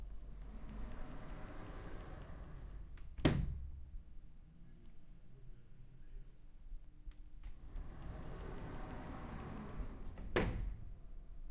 An office sliding door.